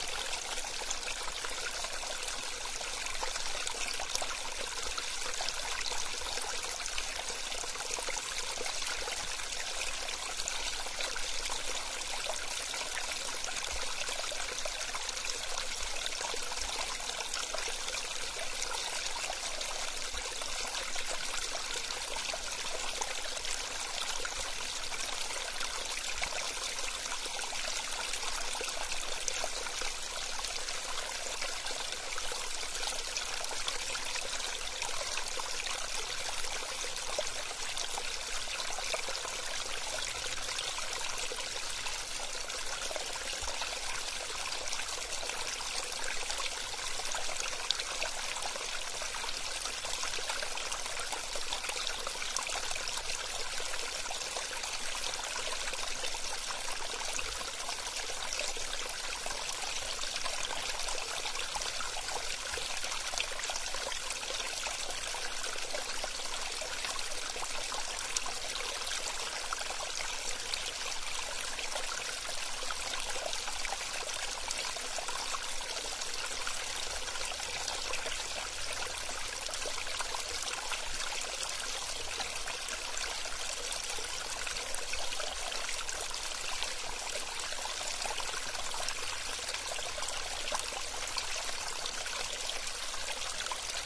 wahtum lake trickle 03
On a hike by a lake, there were several small and cute sounding streams. Each with it's own character. Recorded with a pair of AT4021 mics into a modified Marantz PMD661.
flow, liquid, outside, relaxing, trickle, water